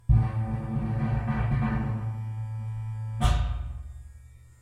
torn - torn
Electric shaver, metal bar, bass string and metal tank.
processing, shaver, engine, tank, Repeating, metal, motor, metallic, electric